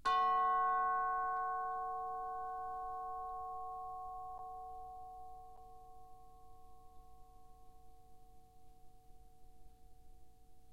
Instrument: Orchestral Chimes/Tubular Bells, Chromatic- C3-F4
Note: F#, Octave 1
Volume: Piano (p)
RR Var: 1
Mic Setup: 6 SM-57's: 4 in Decca Tree (side-stereo pair-side), 2 close